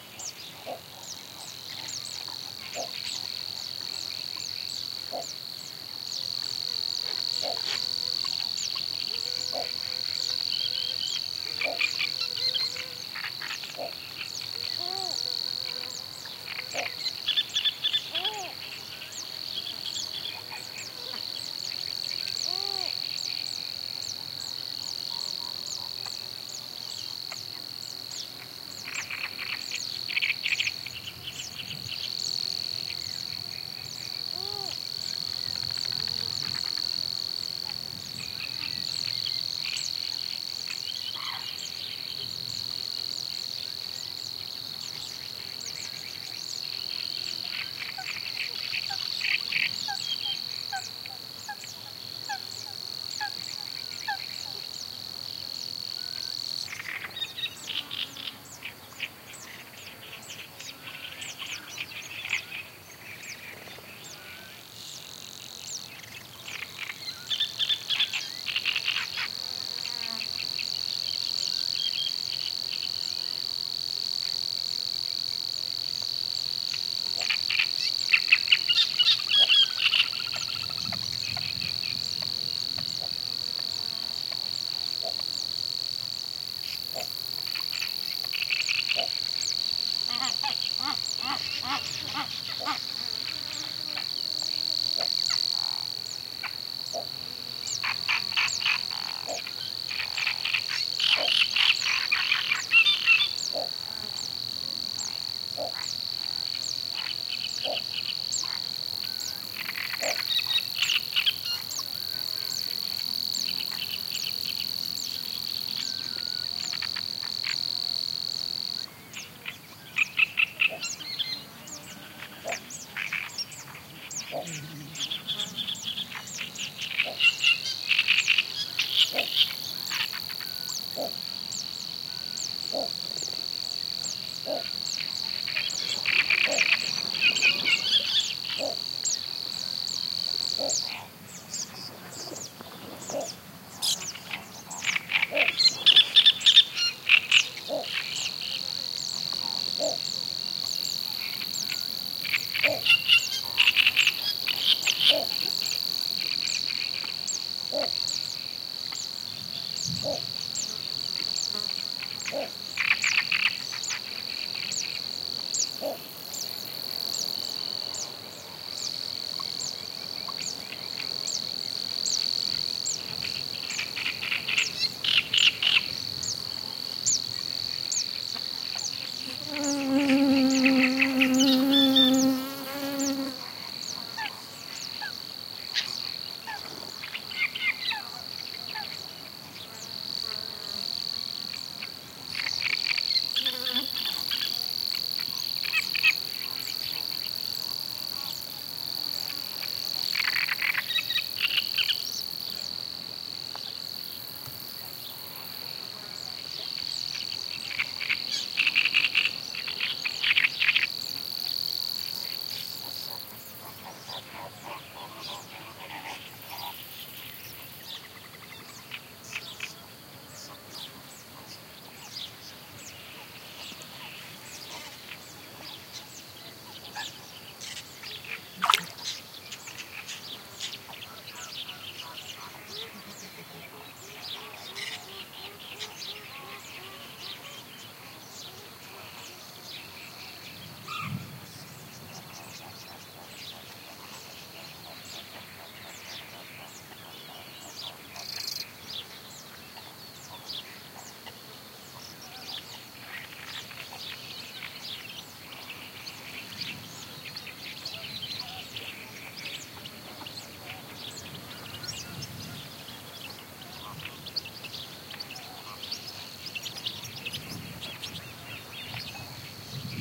20100606.marsh.ambiance.01
marsh ambiance with crickets, buzzing insects, and various bird calls (mostly Great Reed Warbler, but also Little Grebe and Fan-tailed Warbler, as well as House Sparrow and Flamingo at some distance). Recorded with Sennheiser MKH60 + MKH30 into Shure FP24 and Olympus LS10 recorder. Donana National Park, S Spain
duck, nature